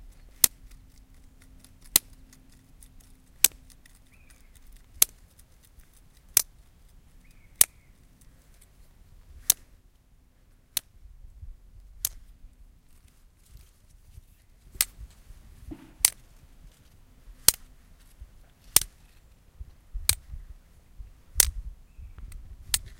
Plastic pen ticking on a rock. Recorded with a Zoom H1.
Pen, Plastic, Rock, Stone, Ticking, ZoomH1